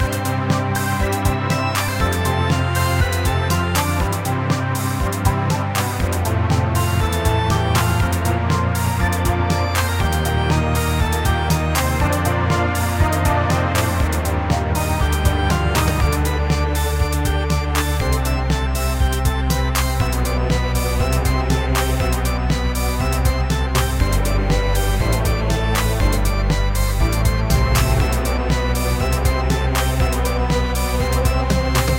Short loops 10 03 2015 5
made in ableton live 9 lite
- vst plugins : OddlyOrgan, Balthor,Sonatina choir 1&2,Strings,Osiris6,Korg poly800/7 - All free VST Instruments from vstplanet !
- midi instrument ; novation launchkey 49 midi keyboard
you may also alter/reverse/adjust whatever in any editor
gameloop game music loop games organ sound melody tune synth piano
game, gameloop, games, loop, melody, music, organ, piano, sound, synth, tune